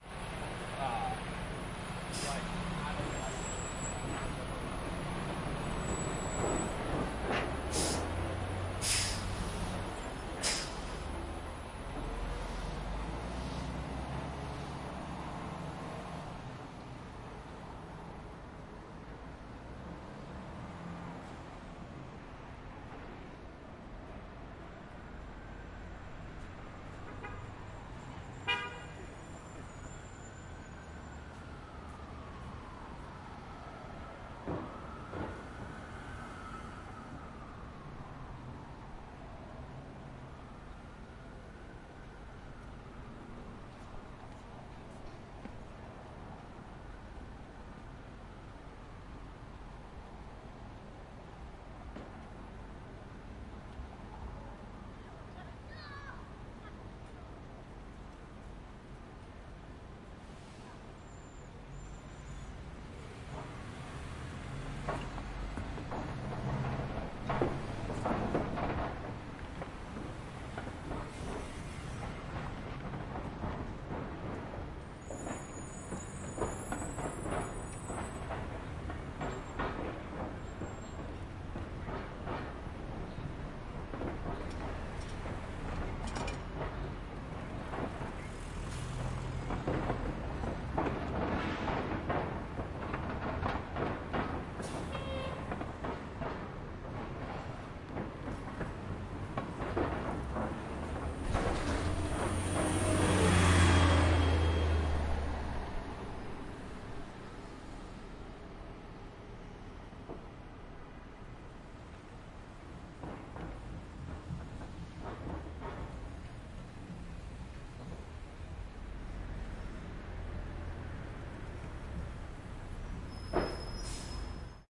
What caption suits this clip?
LA Streets 6th and Broadway Morning 1-EDIT
Recorded in Los Angeles, Fall 2019.
Light traffic. Metal plates at intersection. Buses. Indistinct voices. Pedestrians. Distant siren.
traffic, City, Los-Angeles, Street, field-recording, AudioDramaHub